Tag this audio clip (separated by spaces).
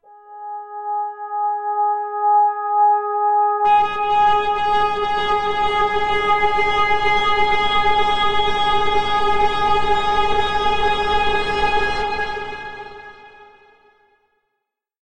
multisample pad